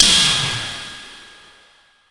A clank noise. From the creator of "Gears Of Destruction".